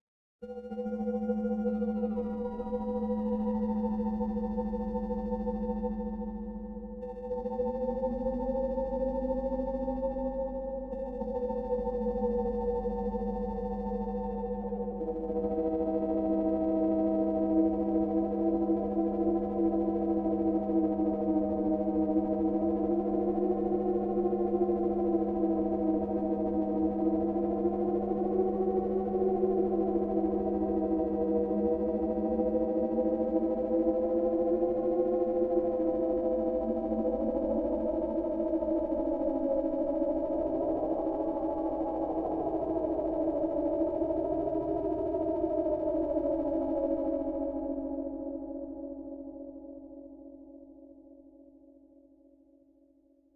Sirens of Amygdala
A strange wind blows through the city and the sound of distant sirens drifts in the air- part of my Strange and Sci-fi pack which aims to provide sounds for use as backgrounds to music, film, animation, or even games.
ambience, atmosphere, city, dark, electronic, music, processed, rhythmic, sci-fi, siren, space, strange, synth